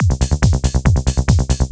TR LOOP - 0513
goa goa-trance goatrance loop psy psy-trance psytrance trance
psy, psy-trance, loop, psytrance, goa, trance, goa-trance, goatrance